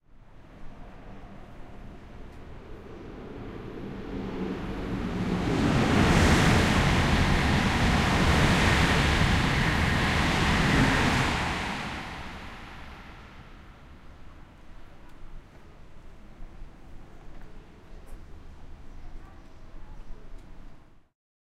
Short sound of a train passing in the station
announcement, crowd, field-recording, France, noise, platform, rail, railway, station, train, train-station